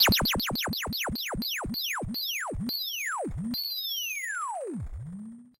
Some Flying Lotus-like FX. cheers :)